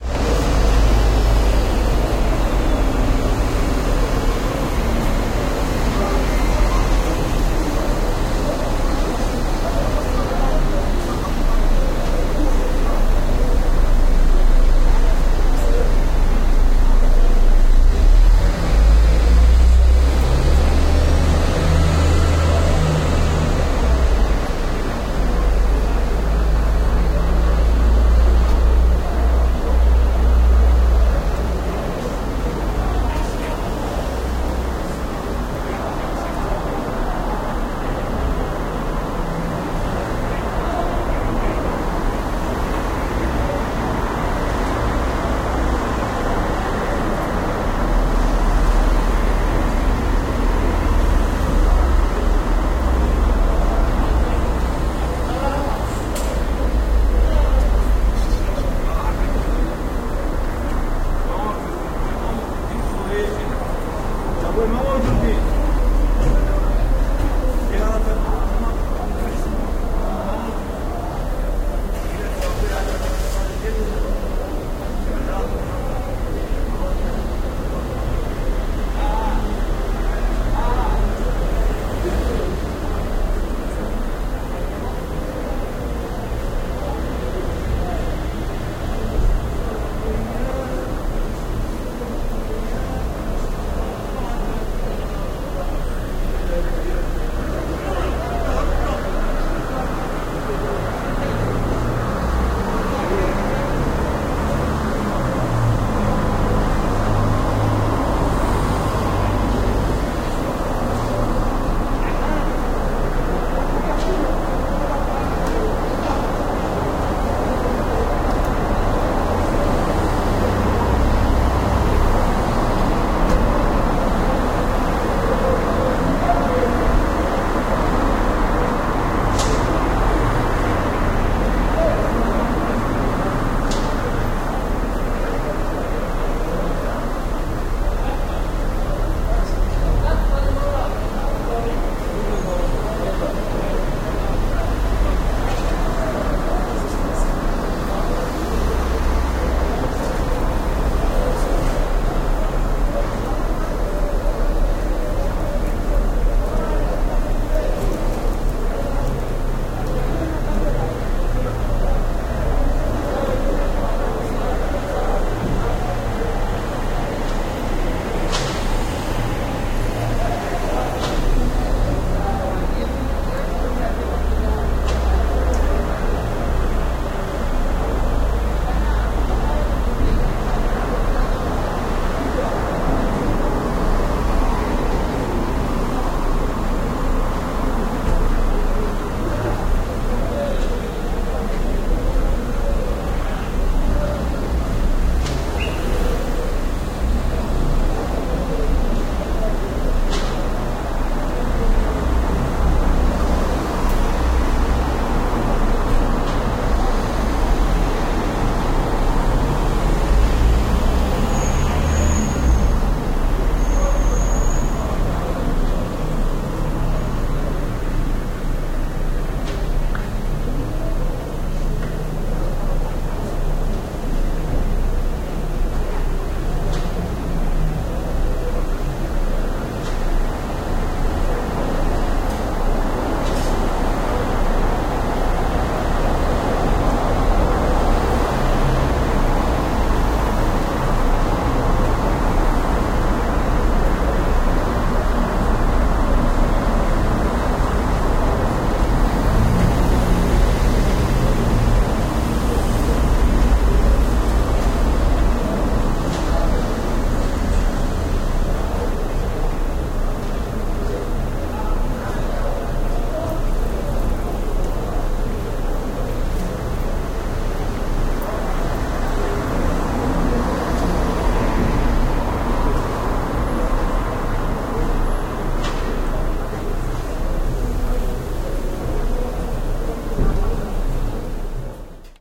Recorded on a summer night with my Roland R-26 (positioned by the open windows facing out onto Grøndlandsleiret).